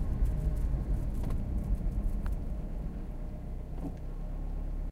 car decel to stop
Honda CRV, decelerating and stopping. Recorded with a Zoom H2n.
car, brake, decelerate